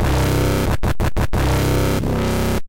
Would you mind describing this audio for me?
180 Krunchy dub Synths 04
bertilled massive synths
180, bertill, dub, free, massive, synth